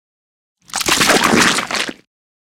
An old style cartoon 'splat'
cartoon, goop, gross, slime, Splat, squish